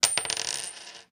Clad Quarter 3
Dropping a quarter on a desk.